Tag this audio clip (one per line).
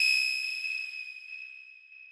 audio
beat
effext
fx
game
jungle
pc
sfx
sound
vicces